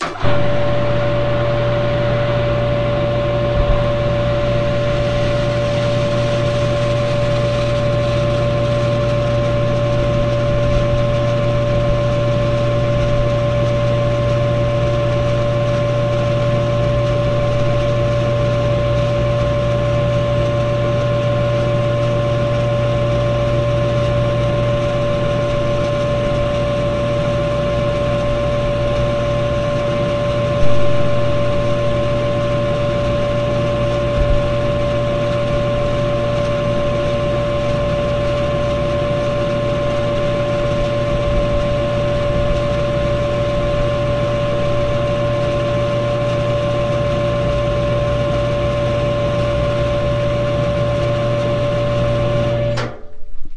The sound of a household basement water pump pumping water from a well. You hear en electric motor as well as the sound of water flowing and being sucked through the pump.
I dedicate this sound to my old friend Casey Mongillo, who will remember this sound well as when we were in the middle of playing a game down in the basement, Grandma would be doing the dishes or having a bath upstairs, and this would go off, ruining our concentration. It's been eons, but I still miss those days.
A very special thanks to the current owners for letting me revisit the house and record the sound of this pump. Sadly, my grandparents who previously owned this house passed away due to cancer, and new people live there. I am grateful to them for letting me revisit this house and get this sound for all of you.